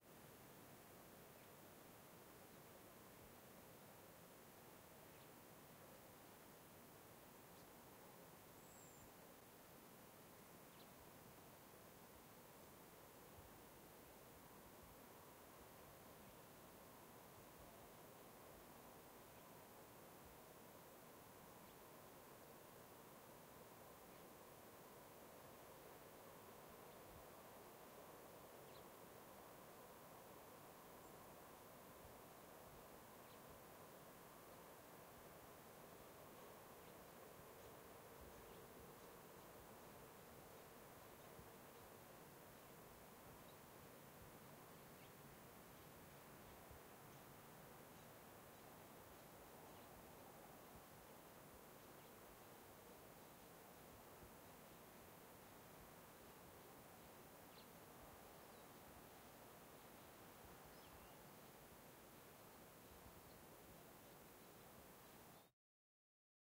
amb; ext; open field; birds; early evening; bformat
Ambisonic b-format recording. Recorded with a Coresound Tetramic. Open field, slightly residential area, early evening. Birds. *NOTE: you will need to decode this b-format ambisonic file with a plug-in such as the SurroundZone2 which allows you to decode the file to a surround, stereo, or mono format. Also note that these are FuMa bformat files (and opposed to AmbiX bformat).
ambiance, birds, field, nature